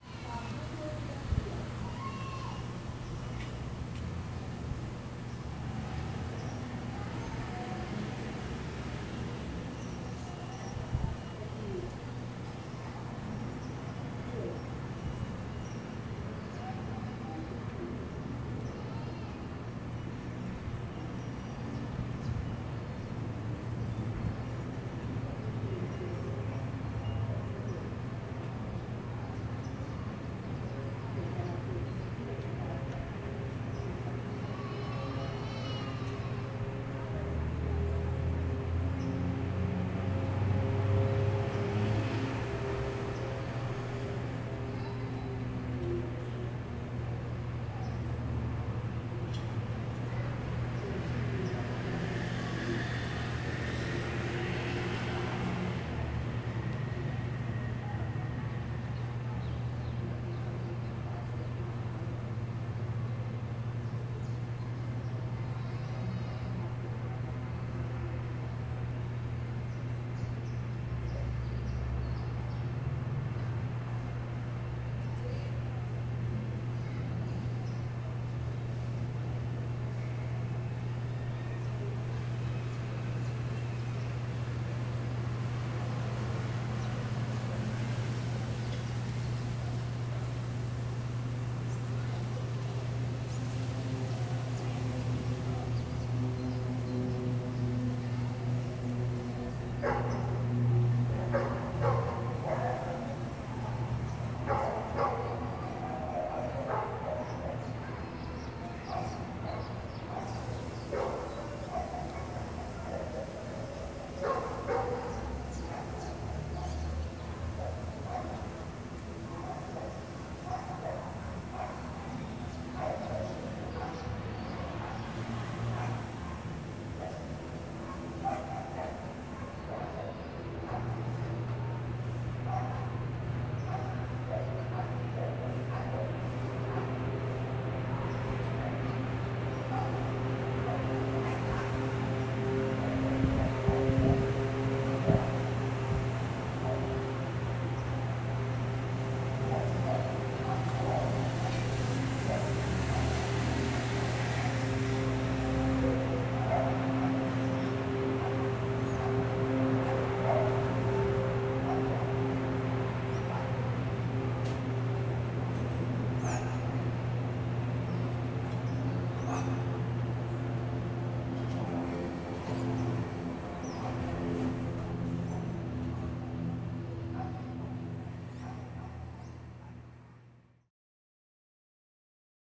The noise of the city
environment, outdoors